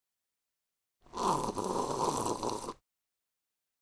Sip noise
This sound shows the annoying noise that some people do when they're drinking.